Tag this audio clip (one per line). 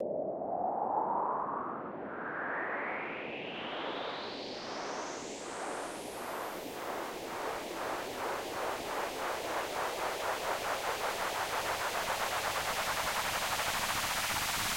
fx
lunar
uplifter